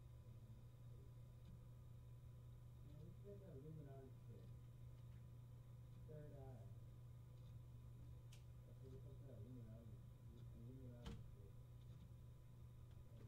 room tone of a quiet room, some voices heard in the background